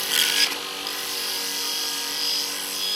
Belt grinder - Arboga - Grinding steel hit
Arboga belt grinder used to grind steel, metal is hit on the belt.
1bar, 80bpm, arboga, belt-grinder, crafts, grinding, labor, machine, metalwork, steel, tools, work